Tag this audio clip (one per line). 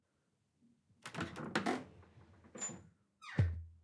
doors
squeaky
close
closing
wooden
open
gate
opening
door